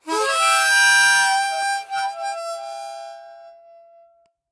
Harmonica recorded in mono with my AKG C214 on my stair case for that oakey timbre.
d, harmonica, key